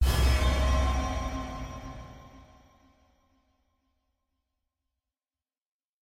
A soft chord with a metal hit
sci-fi, spooky, hit, electronic, metal, thrill, sinister, terror, shock, horror, synth, suspense, sting, creepy, digital, scary, dramatic, surprise, drama, haunted